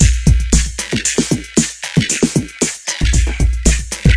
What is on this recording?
115-TR909-AMRadio(4Beat)
Still a pack of loops (because I enjoy doing them) for the lazy ones there are also ready fillings (4Beat).
Created in Hydrogen and Microsampler with samples from my personal library. Have a good time.
115bpm, beat, drums, fills, hydrogen, kick, korg, library, loop, pattern, sample